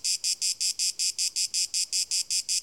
A cicada in a pine at dusk.
Ard, Atmosphere, che, Cicada, Cicadas, Country, Countryside, Field-Recording, France, Nature, Pine, Provence